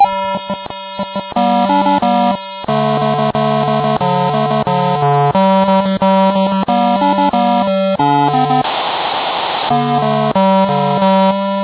recording of a handheld game tronic device. i connected the device directly from the plate to the audio in of my computer. so the sound is kind of original. the batteries were little low, so the audio is mutated and sounds strange.
haribo, synthetic, saw, game, tronic, handheld, game-device